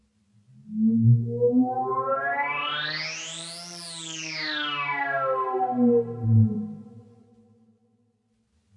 The Hitchhiker
ROLAND JX8P my own sound Patch